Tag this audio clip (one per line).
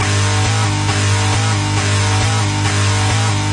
industrial
grind
machinedubstep
glitch